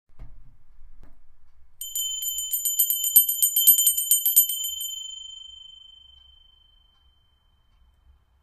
Bell, ringing, ring
ringing, ring, Bell